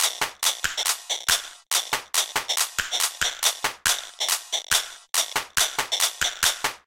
flipside-techno-02
snap, clap, loop, techno, ride, shaker